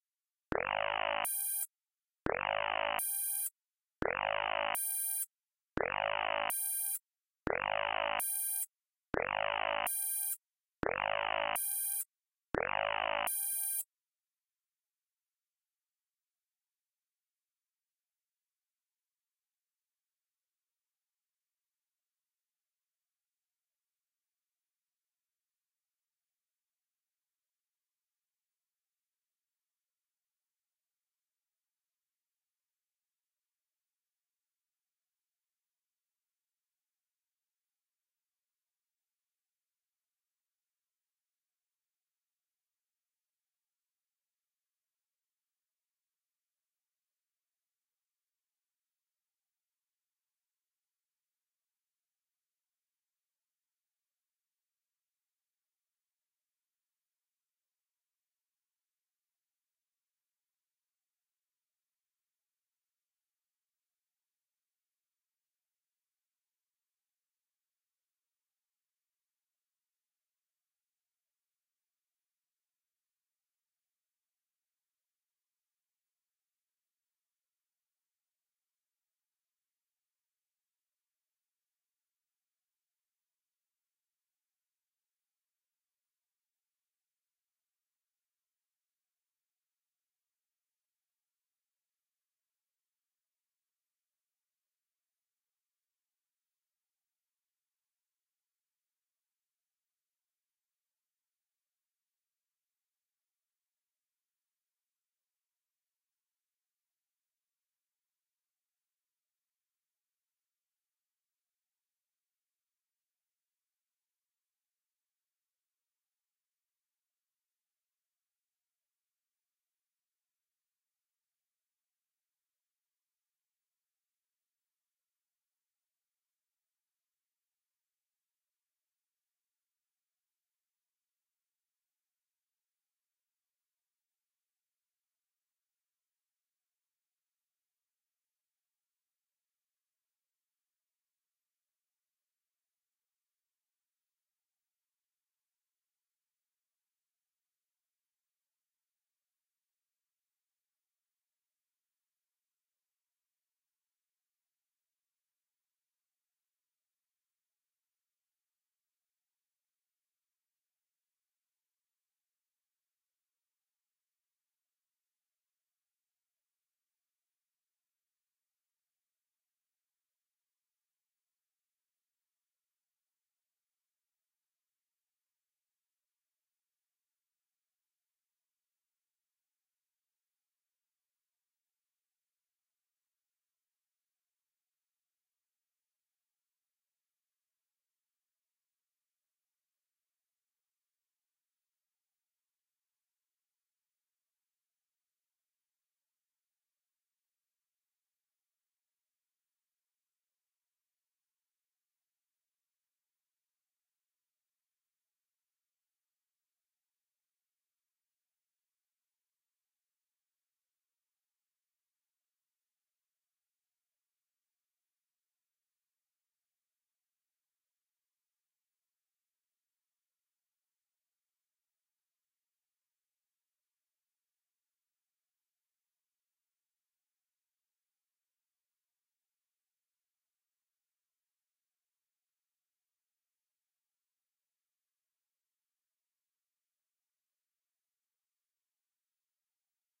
An emergency alarm. Good for a spaceship in distress. Created using FM synthesis.